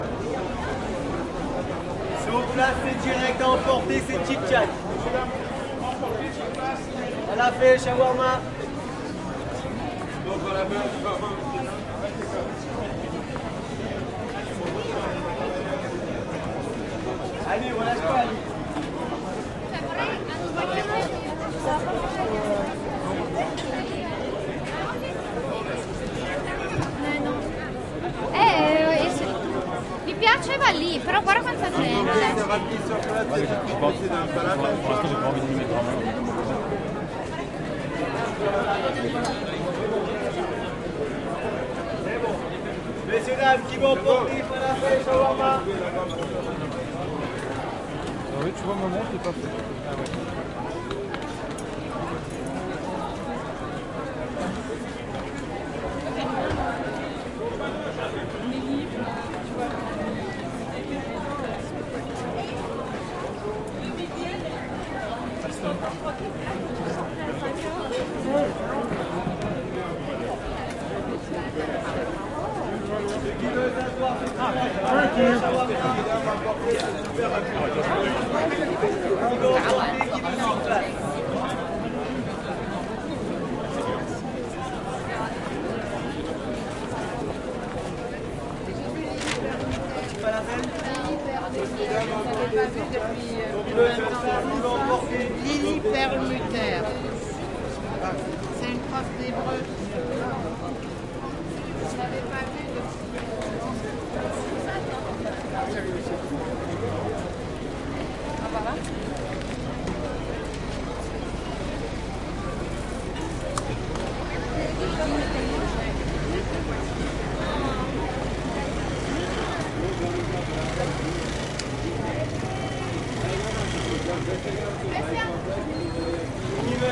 Rue des Rosiers, Paris, France on Sunday (version 2)
A recording of the crowds in the rue des Rosiers in Paris on a Sunday, the busiest day of the week. This is like my other recording except that it has substantially more scattered voices on the recording, in multiple languages.
Recorded on the corner of the rue des Rosiers and the rue des Ecouffes.
March 2012.